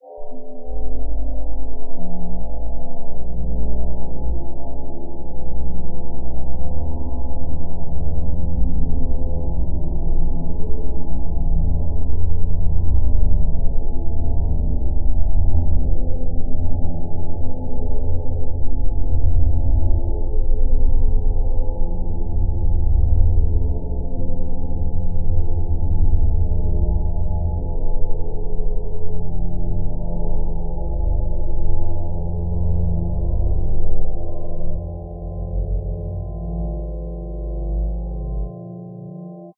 a Ligeti inspired SPEAR experiment for the upcoming pincushioned album